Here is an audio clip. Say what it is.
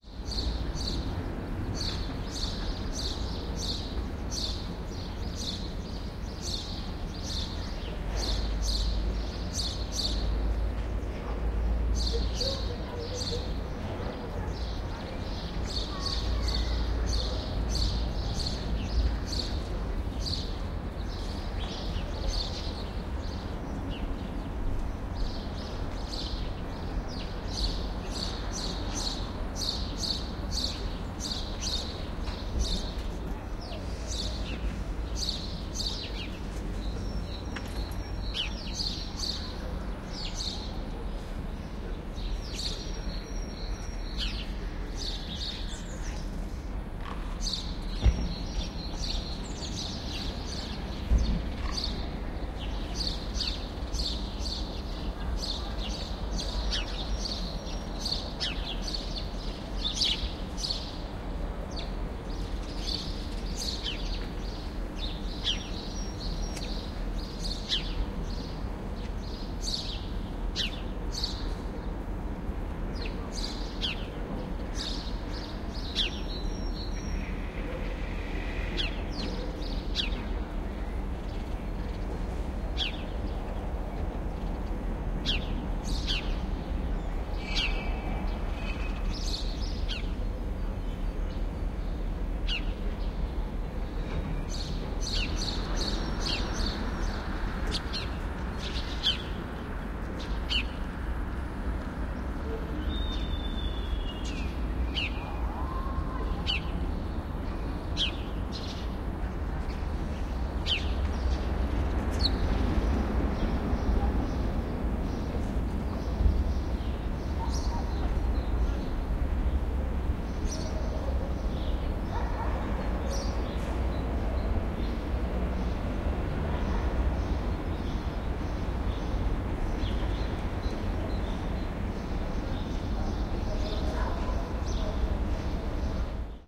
ambience - Moscow sparrows in narrow street atmosphere, early summer
Moscow, field-recording, ambience, Russia